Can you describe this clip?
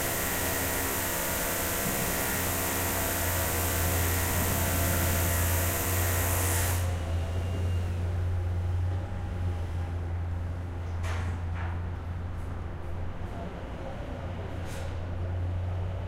mySound GWAEtoyIS GeniusHour construction1
Genius Hour and radio club students from GEMS World Academy Etoy IS, went exploring a construction. And not just any construction... the new sport centre.
construction, field, recording, sport